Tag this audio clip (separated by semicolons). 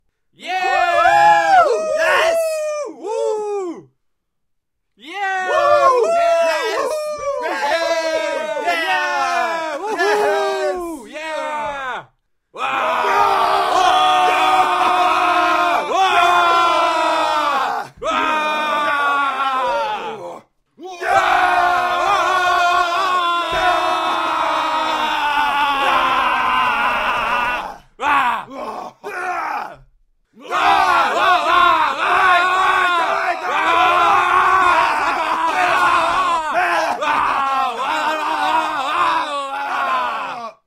sad; cheer; victory; english; game; group; speak; vocal; shout; chant; happy; scream; voice; language; cartoon; animal; game-voice; character